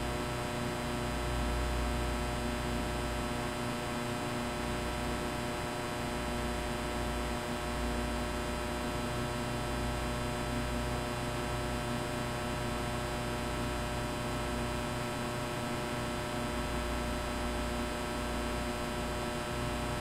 Backrooms Ambience
Featured in the game 'Noclip VR'!! Thank you so so much, Reality Games Online!
This is heavily inspired by the urban myth and/or creepypasta called The Backrooms, which have a very distinct atmosphere to them. This is my interpretation. However, this isn't exclusively for backrooms-related stuff! So by all means, if you're simply in need of some interior ambience of any sort, that's also why I made this for you.
No advanced processing was used to achieve this sound. I recorded an electrical box in my garage for the buzzing. For the 2nd layer, I turned on some fans in my room, and made a long mono recording of the white-noise. I then split the recording in half, and used each half for the left and right channel, giving it a stereo sound. I then spent an hour leveling all of it because I'm a perfectionist. Yay.
Hardware & Software Used:
-Audacity
-Zoom H4n Pro (Buzzing)
-Rode NT1 (Fan-noise)
(As long as you don't blatantly steal credit, of course.)
room, electricity, workplace, inside, creepypasta, ambience, fluorescent